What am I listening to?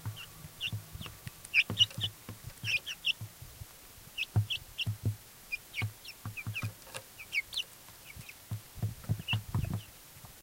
A short recording of our chickens, when they were little.
birds, chick, chickens